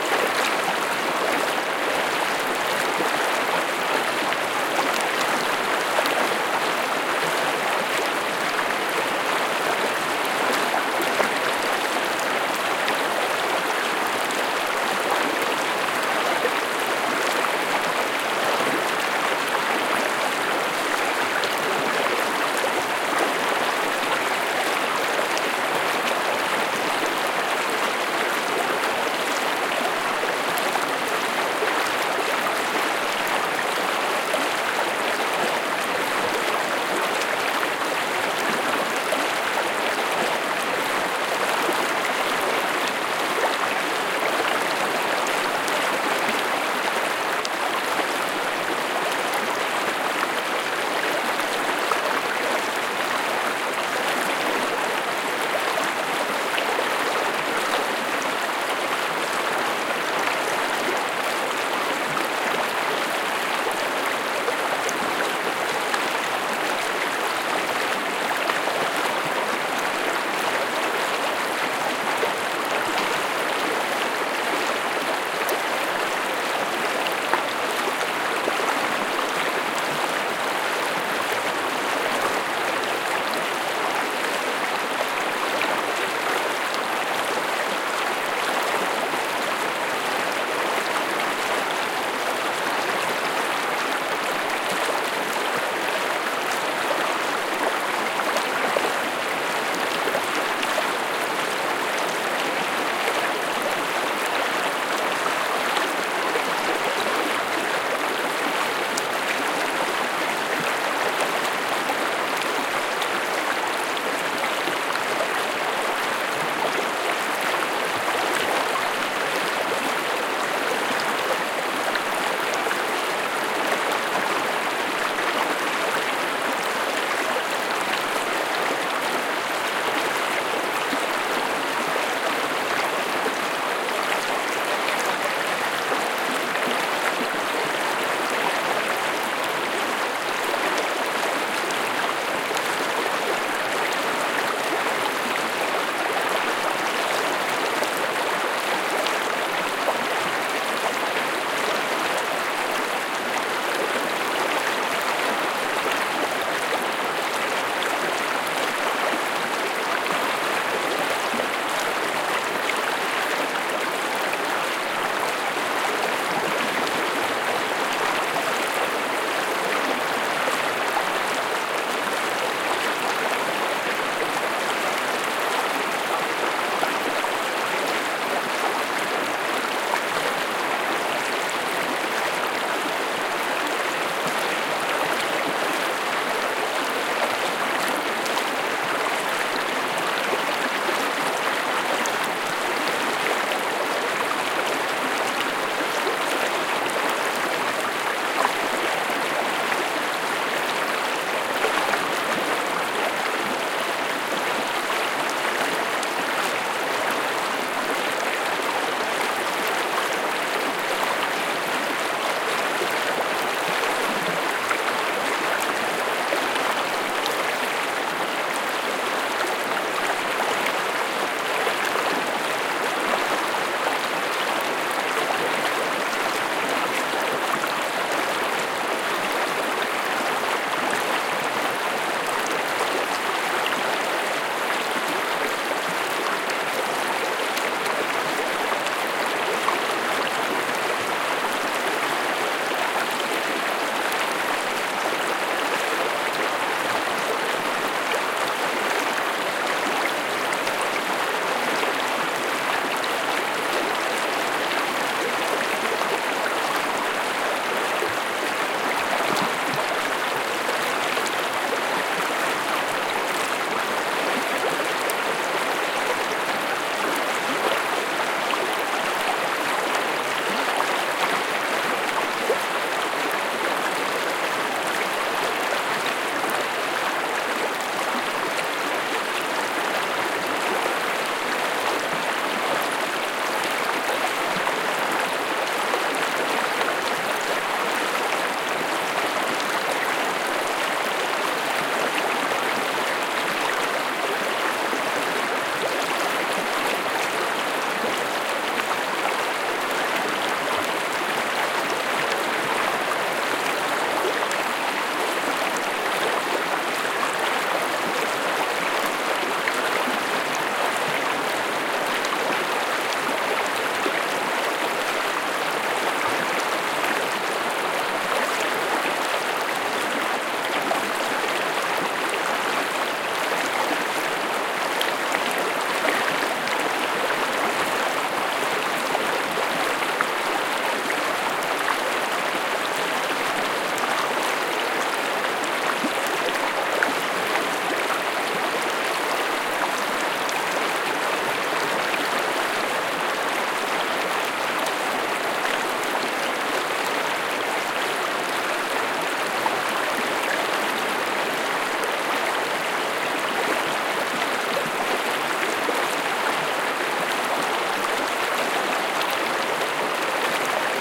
waterflow loop
to my surprise I found that vivid rushing watersound seems to be loopable at any moment of the recording. (recording 3 of 3)
creek, nature, finland, liquid, stream, river, relaxing, water